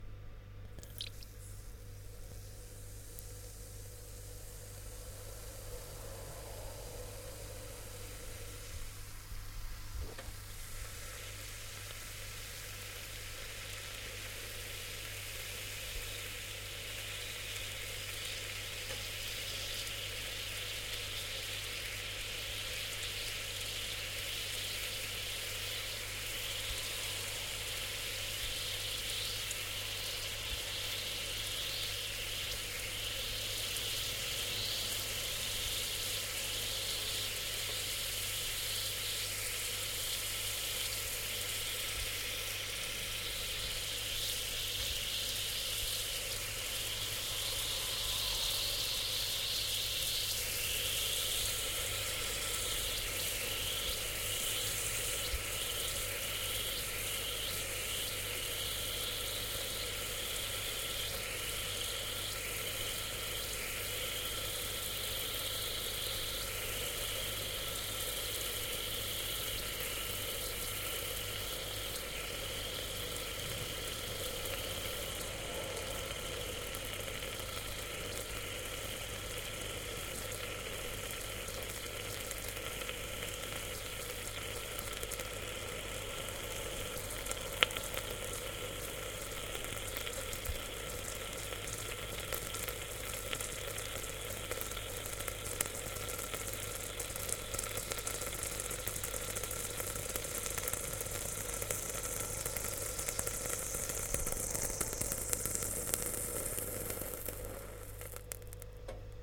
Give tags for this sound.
evaporate
hiss
ice
kitchen
steam
vapour
water

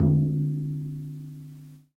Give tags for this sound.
vintage
lofi
hand
lo-fi
tape
collab-2
Jordan-Mills
drum
mojomills

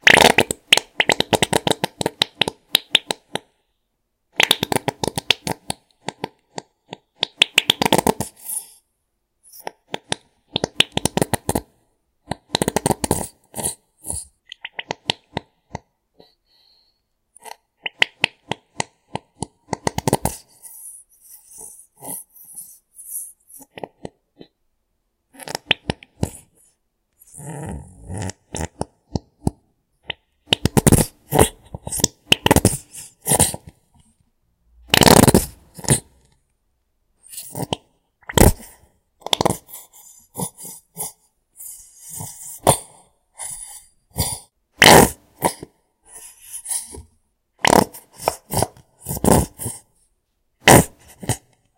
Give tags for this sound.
effect
sauce

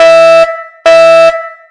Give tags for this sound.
Emergency
Noise
Fiction
Attack
Fire
Alarm
War

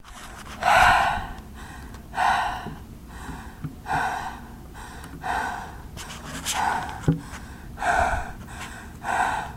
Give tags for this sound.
breathing
dragnoise
running

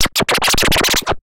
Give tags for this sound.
vinyl
turntable
dj
rap
loop
scratch
hip-hop

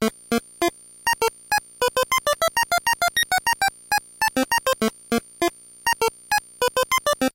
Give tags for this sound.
8bit
cheap
chiptunes
drumloops
gameboy
glitch
nanoloop
videogame

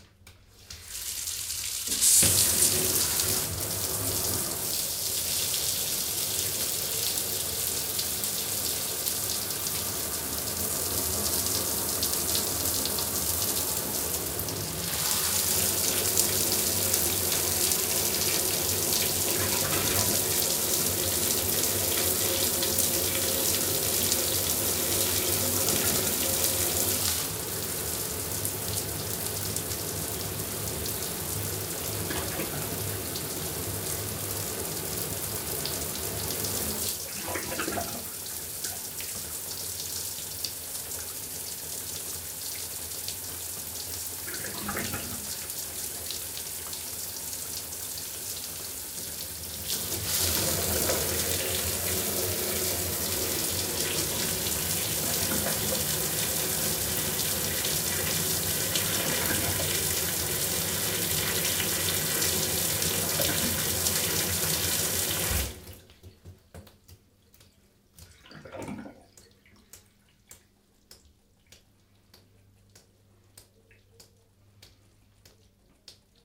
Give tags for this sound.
bathroom
shower
showering
water
wc

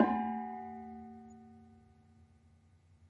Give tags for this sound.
Casa-da-m
digitopia
Digit
Gamelan
o
Java
pia
Gamel
porto
sica

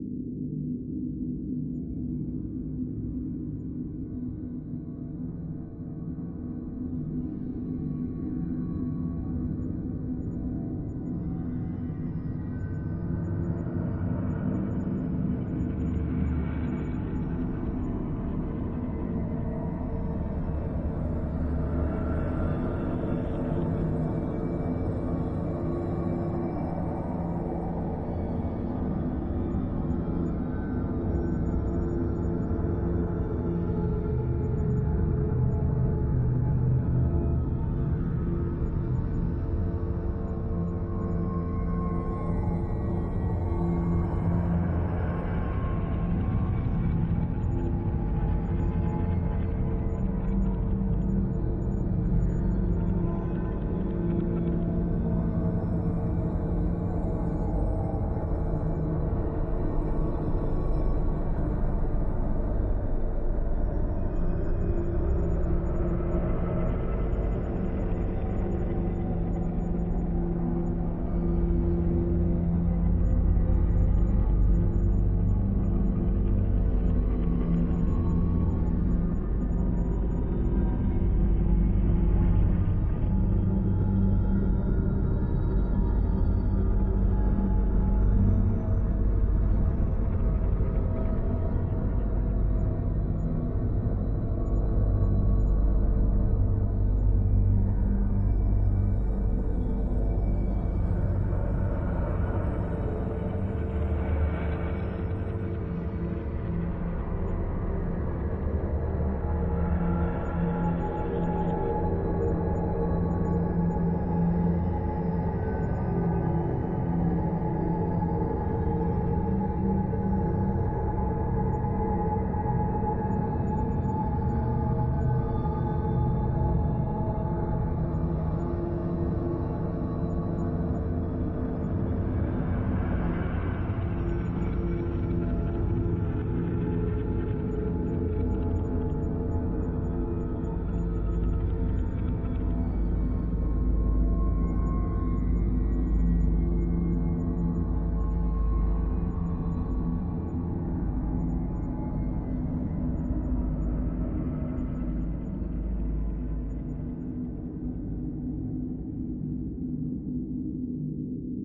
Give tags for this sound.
Atmosphere
Dark
Loop
Background

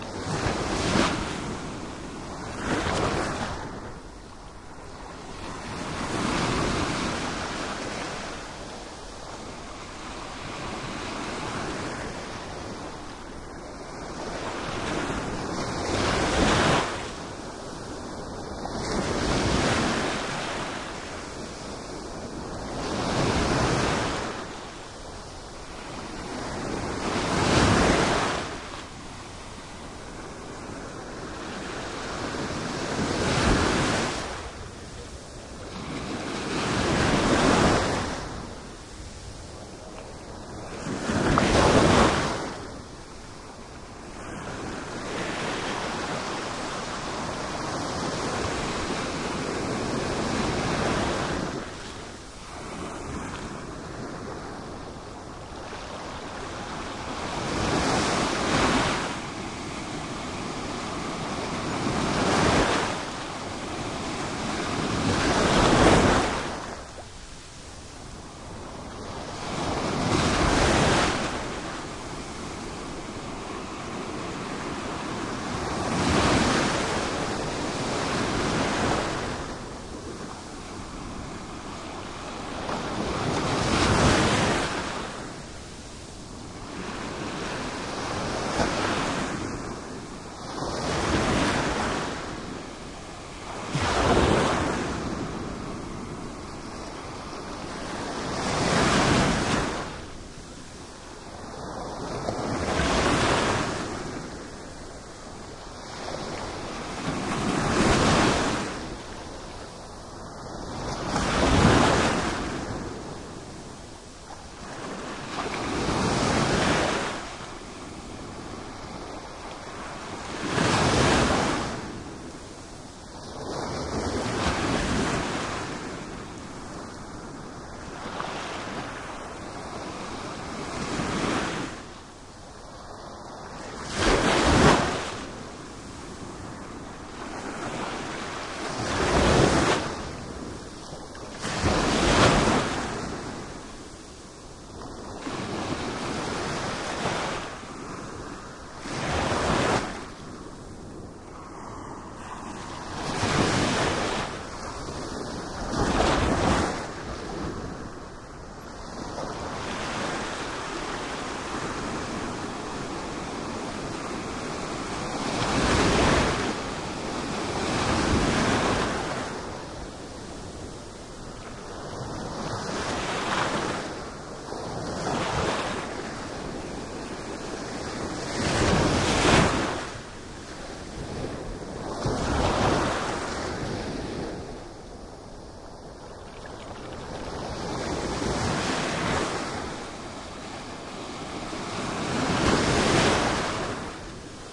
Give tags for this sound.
beach
ocean
Portugal
splash
summer
surf
waves

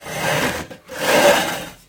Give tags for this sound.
Chair
Concrete
Drag
Dragged
Metal
Pull
Pulled
Push
Pushed
Roar

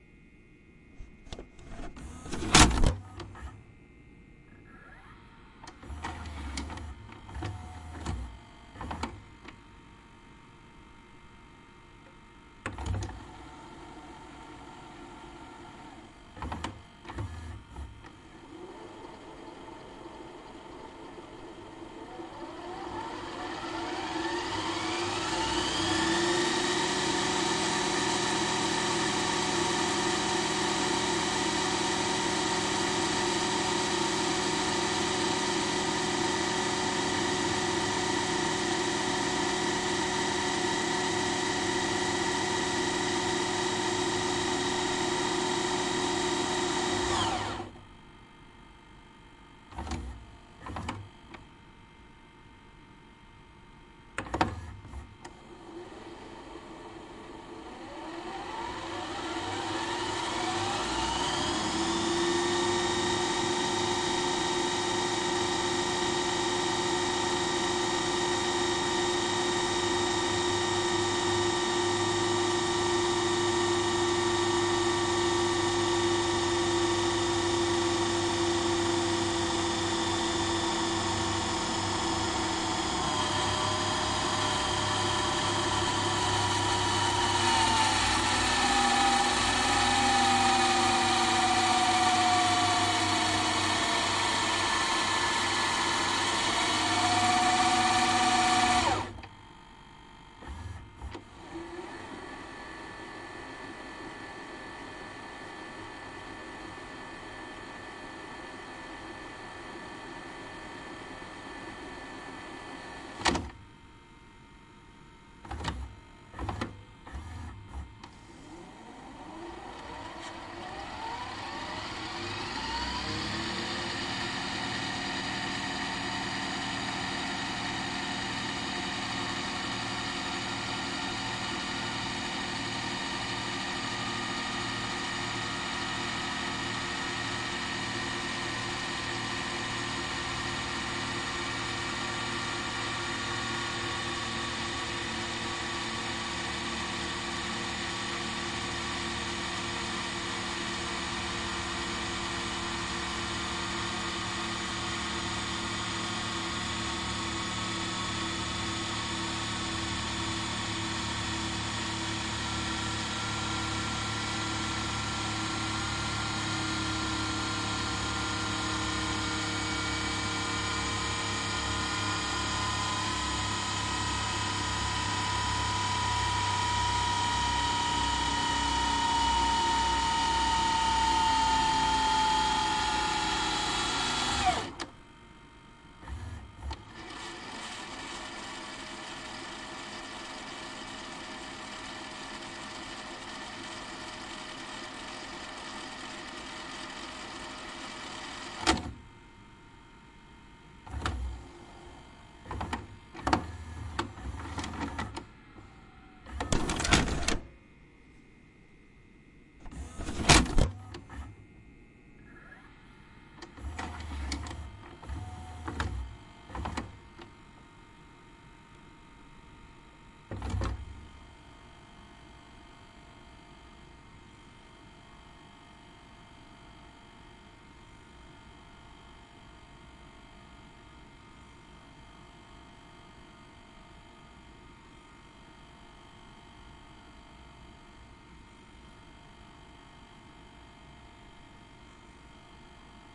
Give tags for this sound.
loading
DV
machine
eject
button
cassette
player
tape
noise
heads
mechanical
fast
television
minidv
electric
stop
DVCAM
90s
sony
TV
recorder
rewind
forward
click
VCR
digital
pause

